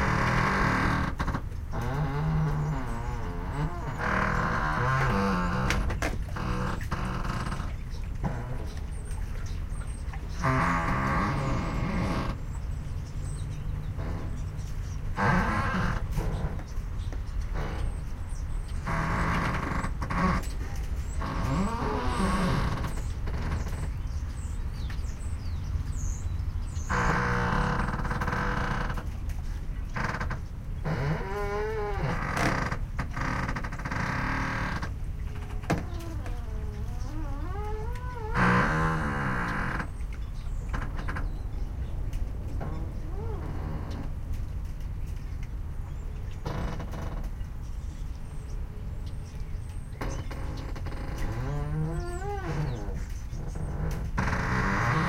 Boat Ramp
Large metal wheel rolling on a track supporting the loading ramp of an old ferry boat. Recorded with a Sony MZ-RH1 Mini disc and unmodified Panasonic WM-61 electret condenser microphone capsules.
ramp, gate, dock, boat, loop, door, creaking, field-recording, creak, ferry